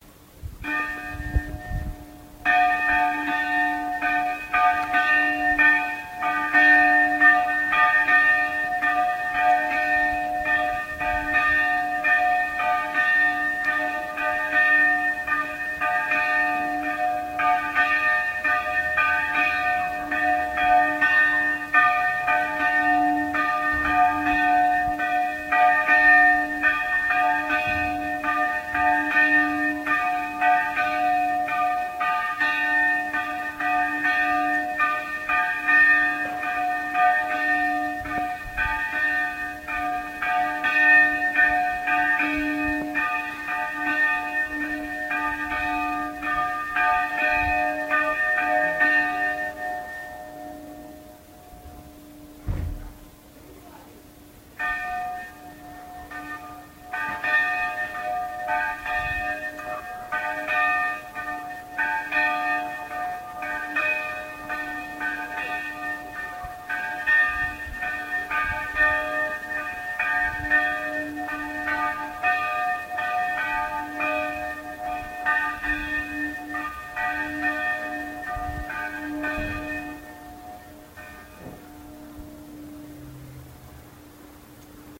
The old church bell of my village in Cyprus. This church bell probably still exists, but we don't hear it very often because they replaced it with an electric one which doesn't produce the same sound. The old church bell was manual, and it was never the same because each person ringing it was adding its own speed, rhythm etc.
The electrick one is always the same all the time.
The church bell was recorded on Saturday, the 3rd of April 2010, at 11 pm. It was the call for the Easter Service which starts at 11 pm and finishes just before 3 am in the morning.
It was recorded with a nokia n95 mobile phone, and I had to boost the volume.
The recording quality is not the best, but I want to keep this sound because I grew up with it and I don't want it to disappear.
Nikos